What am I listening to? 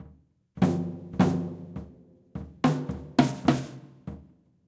toum toum takatata
acoustic, drum, loop, tom